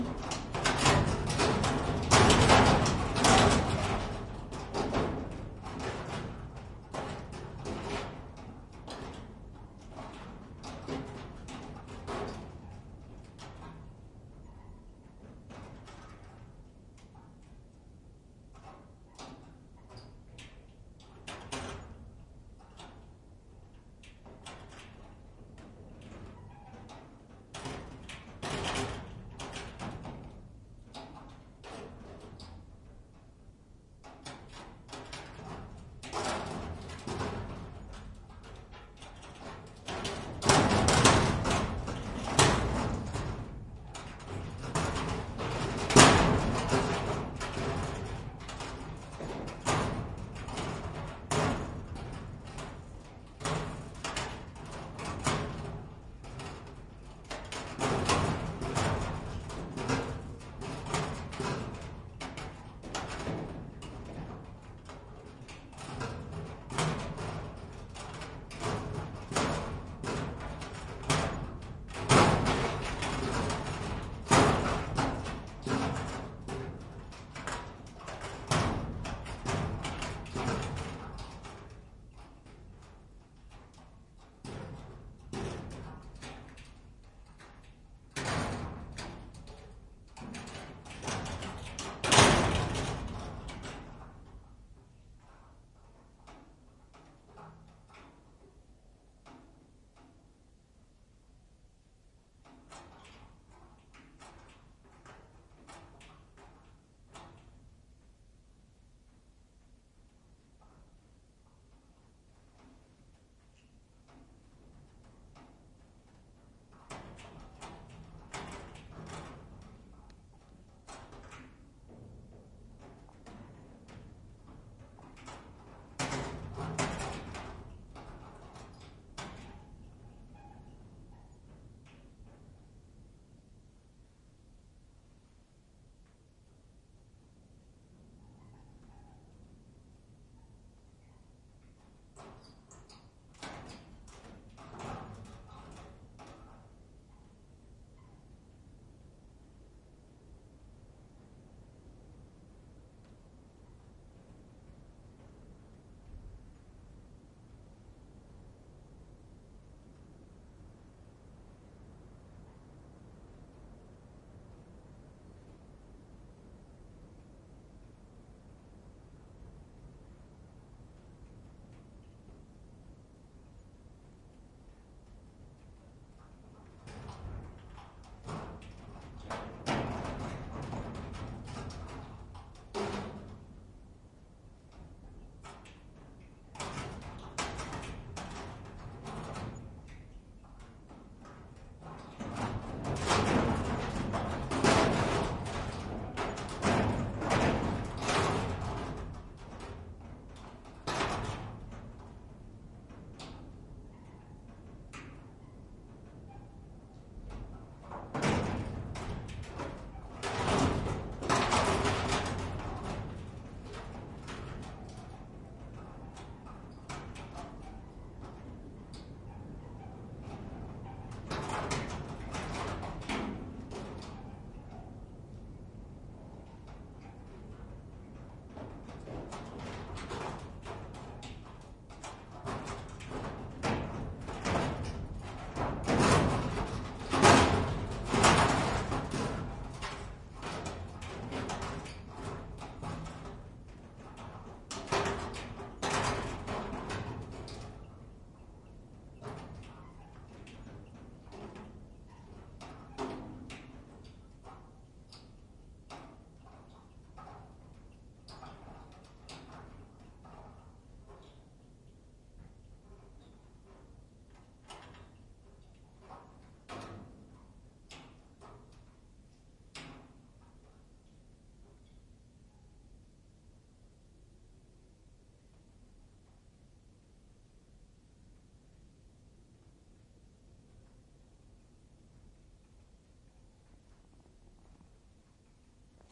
Zinc roofing sheets in the wind // Calaminas al viento
Some rusty zinc roofing sheets in the wind in the abandoned town of Pedro de Valdivia, Antofagasta, Chile, a former saltpeter processing station.
Recorded on a MixPre6 with LOM Uši Pro microphones.